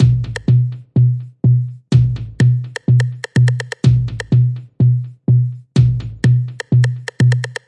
LS PTH TOPLOOP 025 125
techno, house, minimal